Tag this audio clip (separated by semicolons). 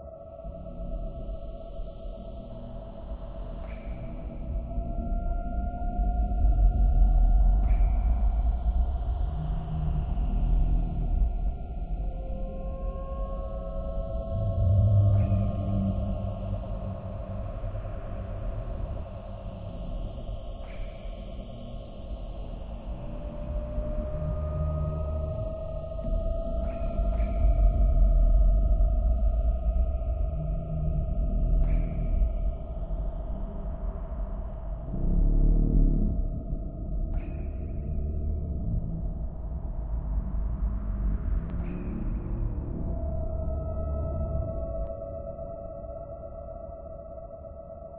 ambient; creepy; game; video; dungeon; loopable; ambience; dripping; cave; scary